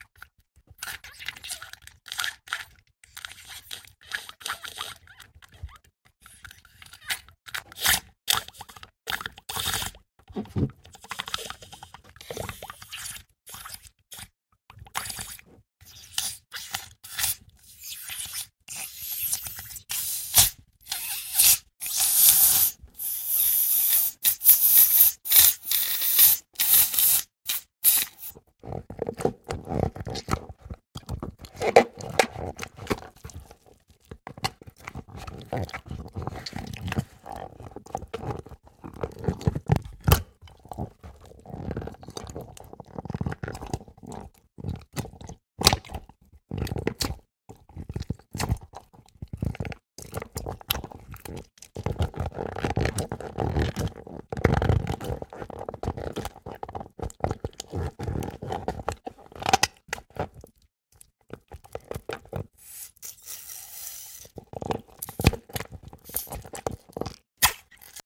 Wet InBathWithBalloon
This is part of the Wet Sticky Bubbly sound pack. The sounds all have a noticeable wet component, from clear and bubbly to dark and sticky. Listen, download and slice it to isolate the proper sound snippet for your project.